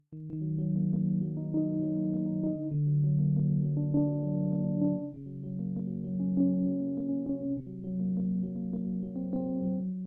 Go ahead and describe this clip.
addin extra
A dramatic chord progression. Maybe if you do films you could put it in when the drug addict goes into depression? Maybe used for the Dark plad.